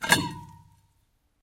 Plastic sewage tube hit 17
Plastic sewage tube hit
hit, Plastic, sewage, tube